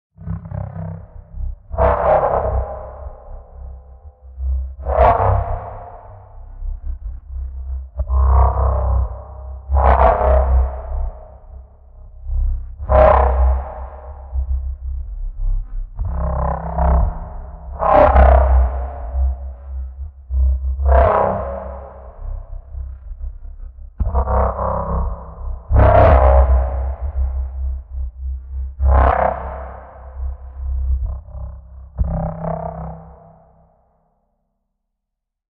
deep growl 4
Tweaked a preset and ended up with some of these. Might be useful to someone.
2 OSCs with some ring modulation ,distortion and reverb.
alien, fx, horror, robot, sci-fi, synth